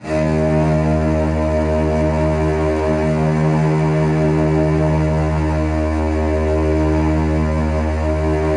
1514 S2stgorchFRK-D#1-TMc
Looped in Redmatica KeyMap-Pro. Samples have Note/Key data embedded in audio files. Just load into a sampler and hit the "automap" button, otherwise map to note names in the file names.
Strings, Bowed, Soft, Modeled-String-Orchestra, Multisample